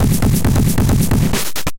135 Moonshine club drums 03-kix
synth, variety, gritar, blazin, guitar, bit, distort, crushed